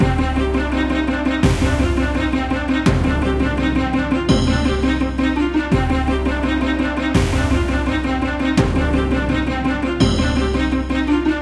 Epoch of War 2 by RAME - War Victory Fight Music Loop
Epoch of War is a war theme looping sound with triumphant and cinematic feel to it. There are a few variations, available as Epoch of War 1, Epoch of War 2, and so on, each with increasing intensity and feel to it.
I hope you enjoy this and find it useful.
army, battle, cinema, combat, energetic, epic, epoch, fight, fighting, film, loop, military, movie, trailer, triumph, triumphant, war